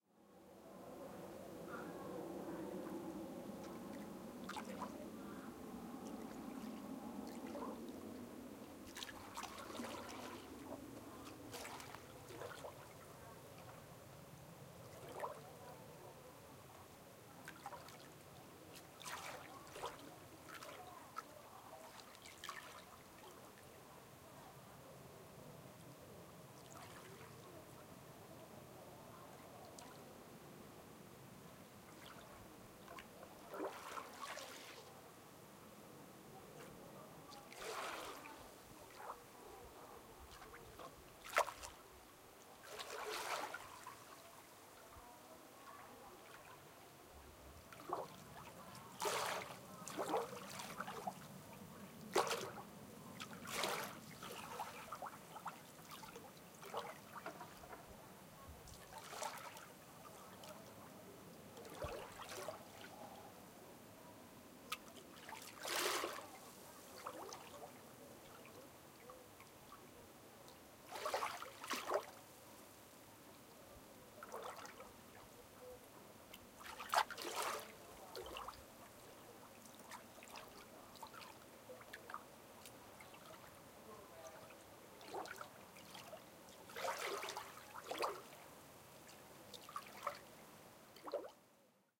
Seashore light wave
Stereo recording of sea waves recorded at a pier in Tai O, Hong Kong. The light waves are brought by the light breeze. Elderly are sitting on the bench nearby. You can hear them chatting. Recorded on an iPod Touch 2nd generation using Retro Recorder with Alesis ProTrack.
water, hong-kong, pier